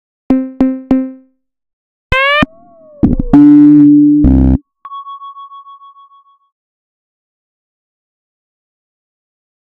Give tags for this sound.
120BPM
dance
electro
electronic
loop
rhythmic
sci-fi
weird

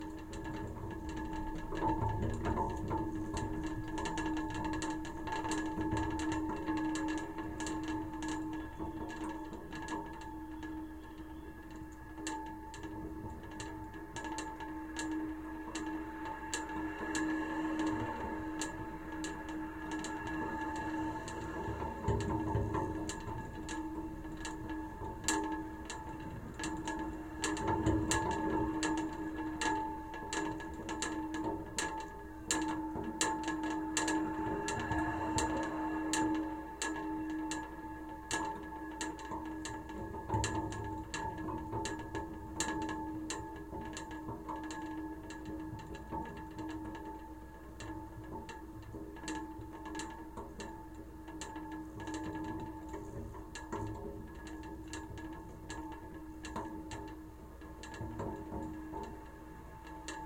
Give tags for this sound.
traffic-noise
contact-microphone
mic
Sony
contact-mic
contact
DYN-E-SET
field-recording
PCM-D50
Schertler
rattle
wind
lamp-post
wikiGong
resonance

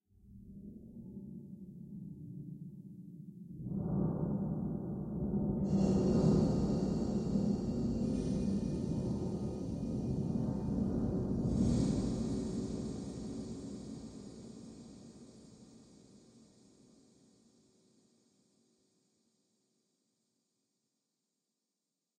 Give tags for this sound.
tin
reverb
plate

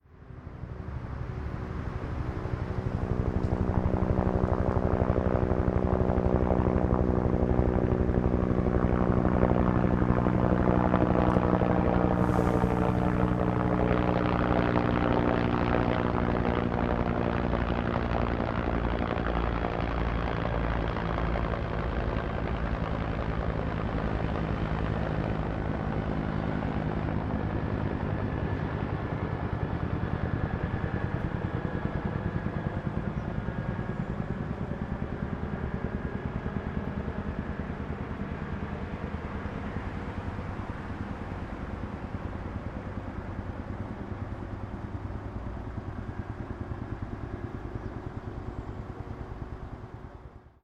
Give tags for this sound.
passing
distance
Helicopter

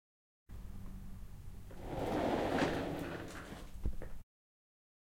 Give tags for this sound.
FIELD-RECORDING
STUDENT

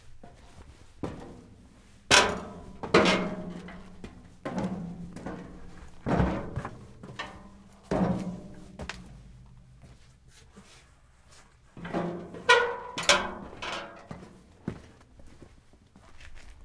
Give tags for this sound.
down
up
metal
stairs
footsteps
shoes

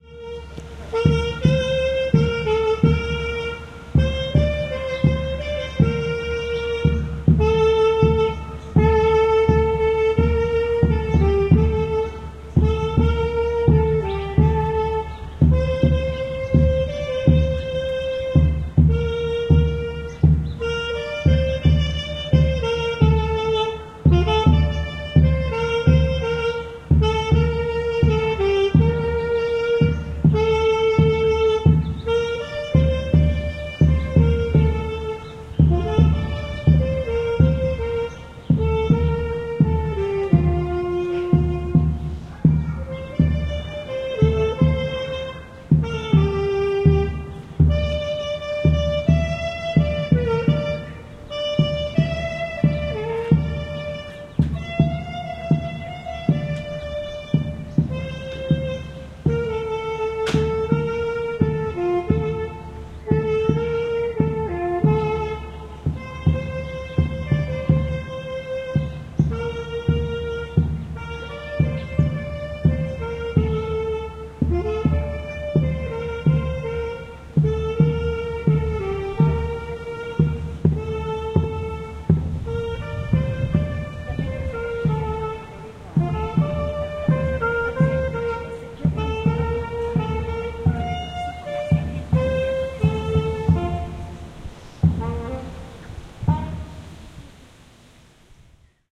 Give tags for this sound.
Mexico
Spanish
binaural
street-musicians
traditional

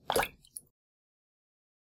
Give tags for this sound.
pouring
Slap
Wet
marine
Dripping
Movie
Running
Water
wave
crash
blop
aquatic
Drip
bloop
Splash
aqua
Sea
River

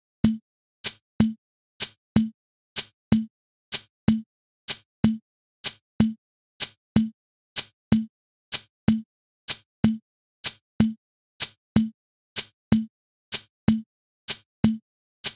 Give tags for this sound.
club
techno
house
dance

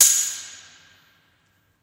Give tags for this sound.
Keys
Percussion
Natural-reverb

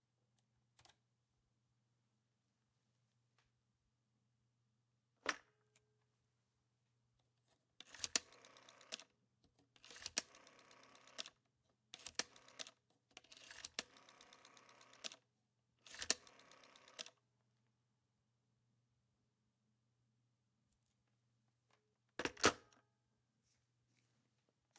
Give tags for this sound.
50s
60s
70s
80s
analog
answer
dail
dail-plate
old
phone
ring-off

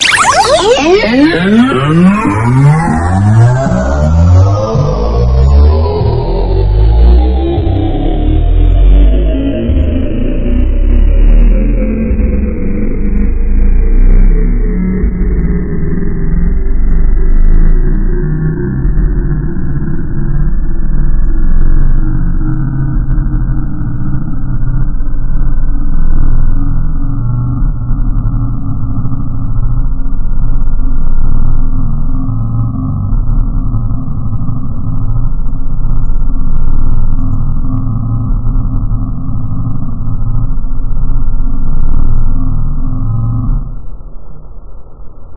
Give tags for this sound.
aliens
space
noise
weird
sci-fi
mechanical

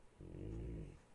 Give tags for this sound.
play
growl
animal
dog
guttural

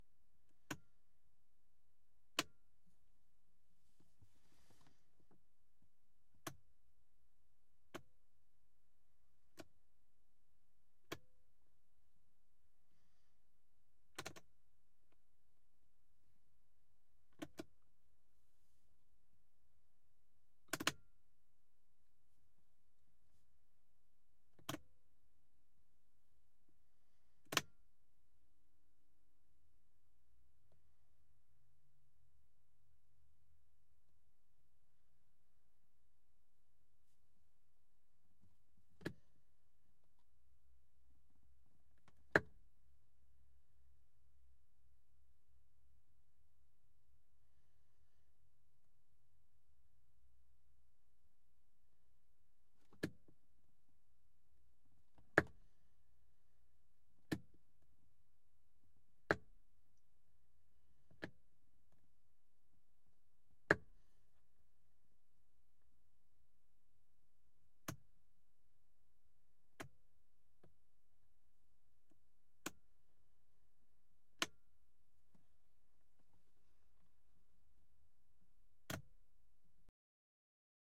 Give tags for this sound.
preview
knob
dyno
photo
slider
switch
test
dial
fader
sessions
recording
process

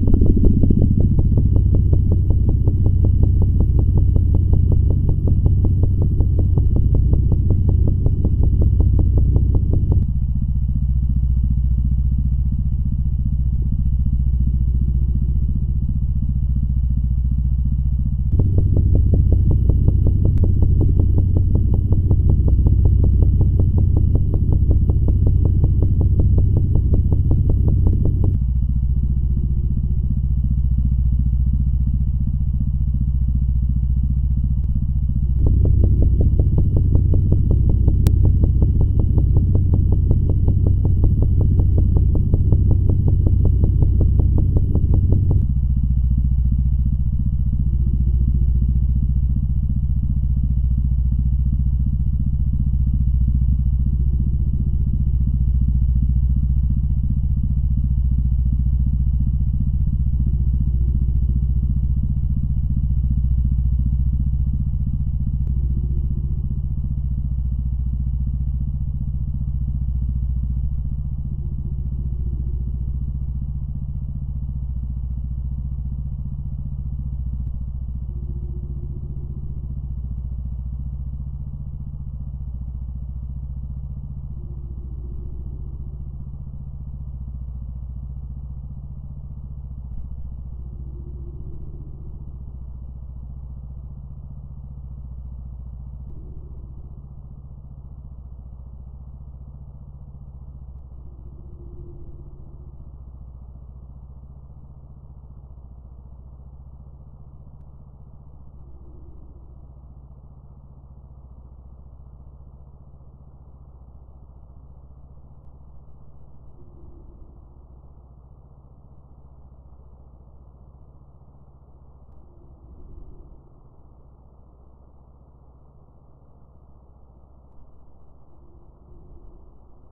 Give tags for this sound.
drone
smooth
dreamy
relaxing
ambient

